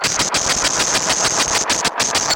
Freya a speak and math. Some hardware processing.